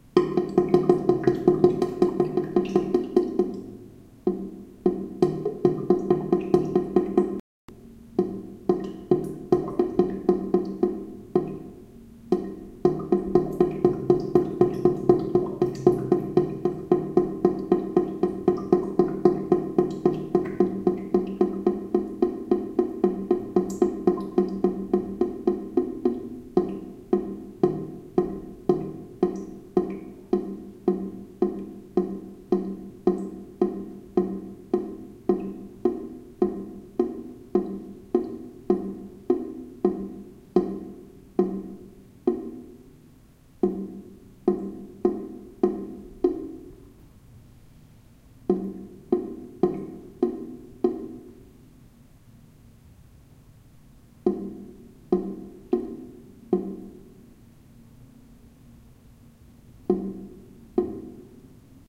A drain after a water flow: The water seems to build up a physical-mechanic surface tension kept mass in the tube, opens and closes this bubble-entity producing a specific rhythm in the plughole resp. the drainage pipe. It pulses with various plops and splashs, starts off with a firework kind of beat but then one hears a differentiated rhythm of 4-strokes sequences with slugs before these etc. getting slower. Many minutes later it ends (beyond this track). The (hearable) cut is just the censorship of a small abortive piece -- left in to document the recording as recording.
drainage, rhythmic, drain, pulse, water, tube